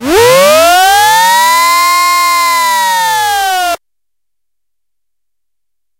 dissonant pitchsweep G#2
This sample is part of the "K5005 multisample 08 dissonant pitchsweep"
sample pack. It is a multisample to import into your favorite sampler.
It is an experimental dissonant pitch sweep sound. The pitch goes up
and down. In the sample pack there are 16 samples evenly spread across
5 octaves (C1 till C6). The note in the sample name (C, E or G#) does
not indicate the pitch of the sound. The sound was created with the
K5005 ensemble from the user library of Reaktor. After that normalizing and fades were applied within Cubase SX.
dissonant, experimental, multisample, pitch-sweep, reaktor